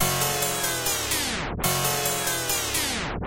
147 IDK melody 05
IDK melody synth